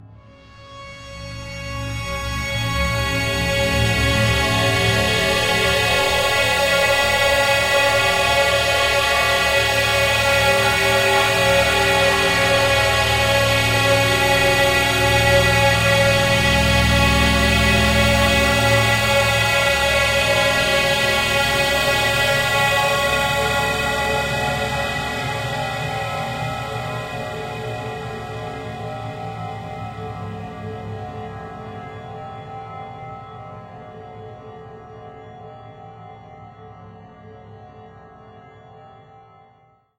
LAYERS 022 - Giant Dust Particle Drone-74
LAYERS 022 - Giant Dust Particle Drone is an extensive multisample packages where all the keys of the keyboard were sampled totalling 128 samples. Also normalisation was applied to each sample. I layered the following: a soundscape created with NI Absynth 5, a high frequency resonance from NI FM8, another self recorded soundscape edited within NI Kontakt and a synth sound from Camel Alchemy. All sounds were self created and convoluted in several ways (separately and mixed down). The result is a dusty cinematic soundscape from outer space. Very suitable for soundtracks or installations.
dusty, soundscape